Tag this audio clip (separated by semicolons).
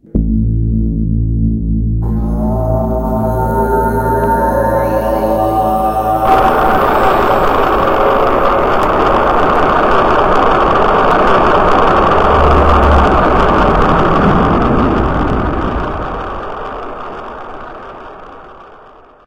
hum; takeoff; singing; throat; tibetan; spaceship; liftoff